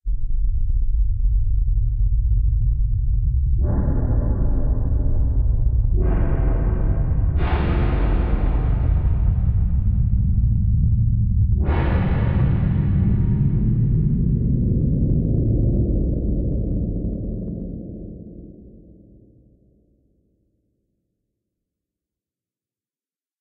A syntesized underground sound with sinister distant noises of what is supposed to be an unknown creature.